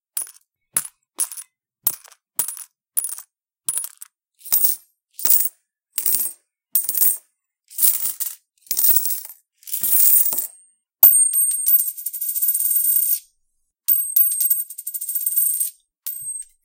Noise removal and compressor applied. Sounds include:
- light, hollow coin dropped into a small bag of coins
- heavier coin dropped into a small bag of coins
- coin tossed on floor, leveling out
- coin tosses on floor, bouncing back
coins, money